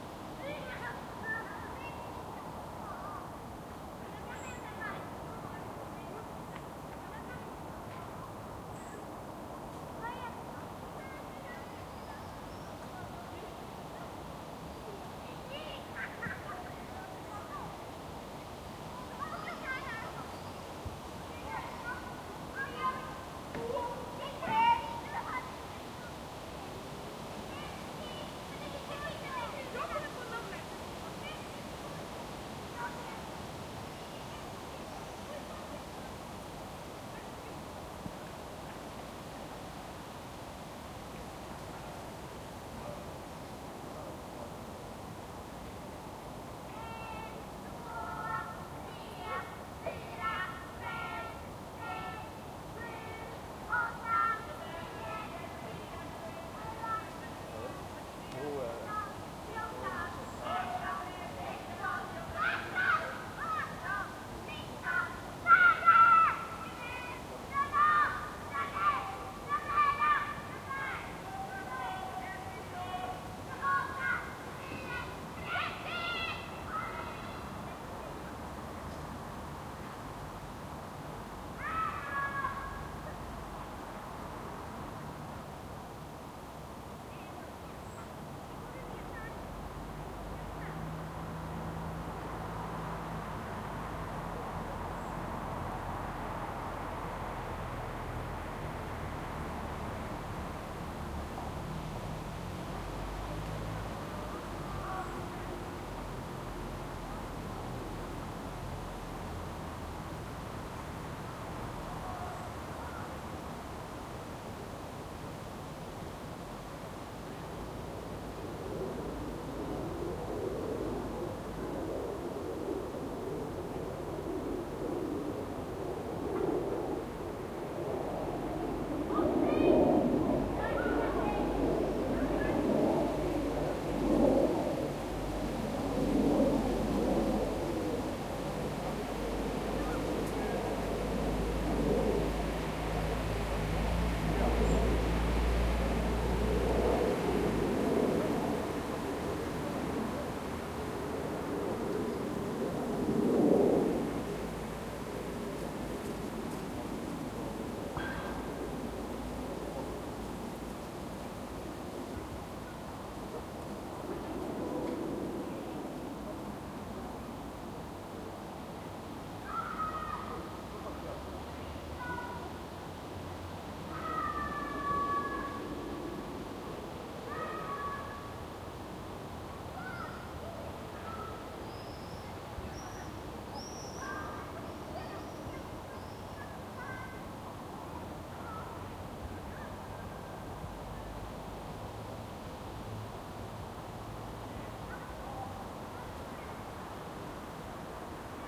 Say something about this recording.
170717 Stockholm Aspuddsparken F
A small neighborhood park in the Aspudden district of Stockholm/Sweden. It is evening, and the park is almost deserted save som children playing in some 200 m distance. Some minimal car and pedestrian traffic can be heard around, in front of a backdrop of birds, trees and distant city noises.
Recorded with a Zoom H2N. These are the FRONT channels of a 4ch surround recording. Mics set to 90° dispersion.
ambience, children, city, Europe, field-recording, park, peaceful, Stockholm, street, surround, Sweden, urban